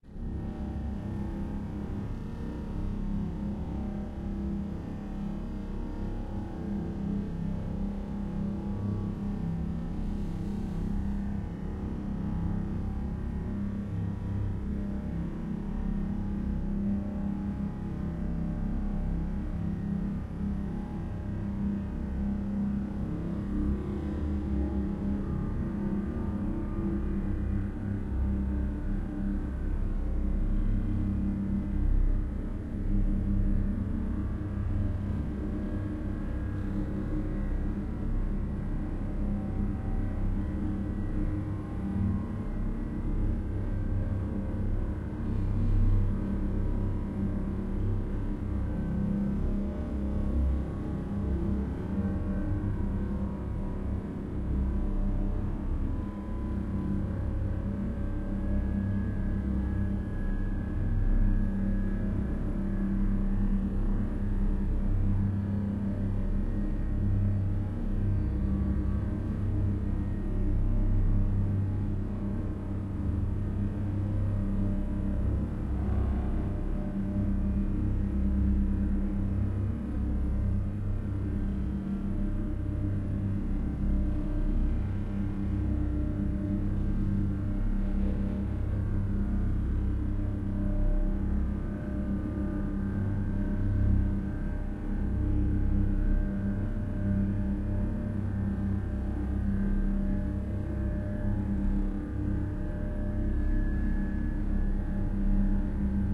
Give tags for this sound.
weird
dark
sound-design
abstract
drone

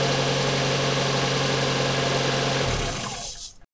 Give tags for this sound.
engine
road
street
traffic

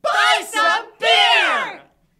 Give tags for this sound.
beer
buy
cartoon
children
fun
funny
kids
shouting
some
yelling

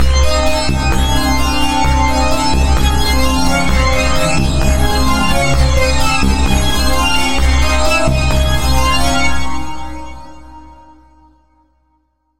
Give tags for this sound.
beat
retrowave
music
escape
synth
trash
movie
video

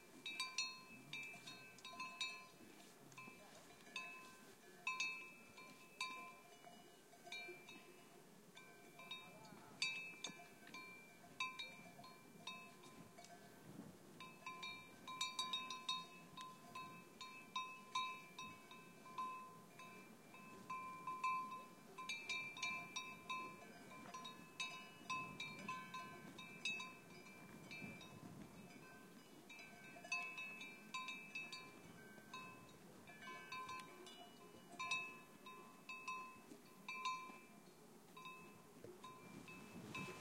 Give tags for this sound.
pyrenees
horse
ambiance
mountain
cattle
bells

